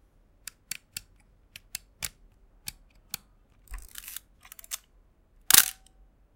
Shutter speed dial adjustment, loading and Shooting a 1982 Nikon FM2